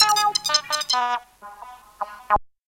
sonokids-omni 21
abstract, analog, analogue, beep, bleep, cartoon, comedy, electro, electronic, filter, fun, funny, fx, game, happy-new-ears, lol, moog, ridicule, sonokids-omni, sound-effect, soundesign, speech, strange, synth, synthesizer, toy, weird